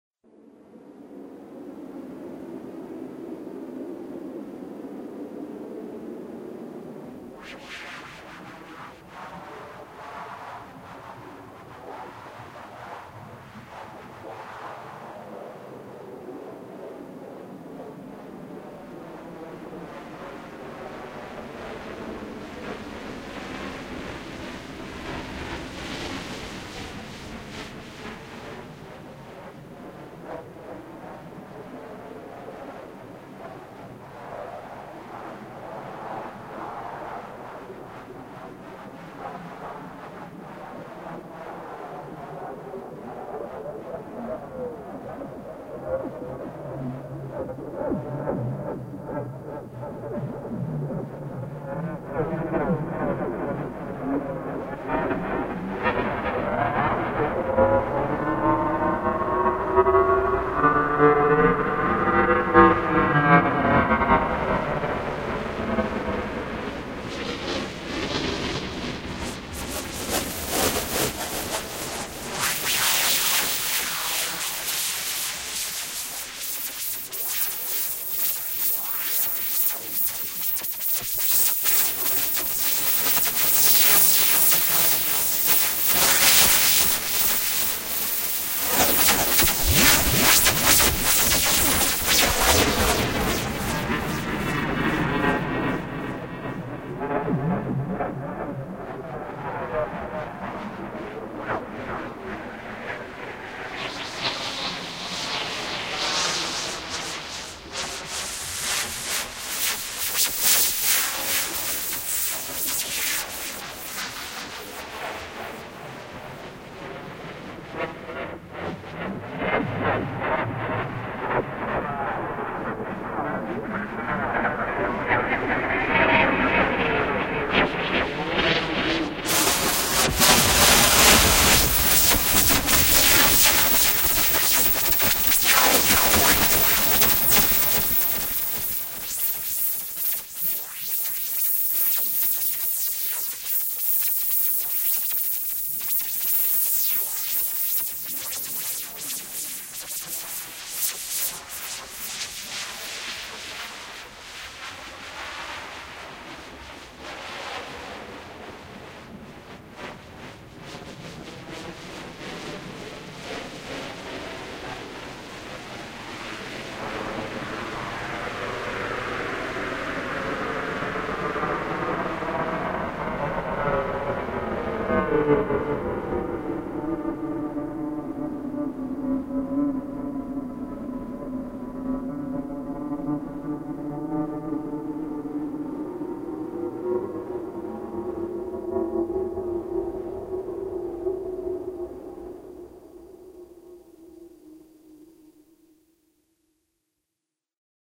This is an impression of wind. It is created with the Clavia Nord Micro Modular and processed with a Boss SE-50. 'Wind' consists mainly of filtered noise. The filtering expresses the way 'Wind' is formed by the other elements.
ambient, competition, elements, impression, soundscape, synthesizer, wind